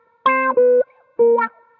GTCC WH 05
bpm100, fm